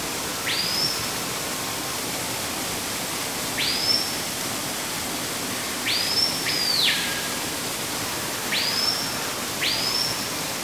Song of a Screaming Piha, with a waterfall. This is actually a very common sound in some movies and TV shows about rainforests and jungles. Recorded with a Zoom H2.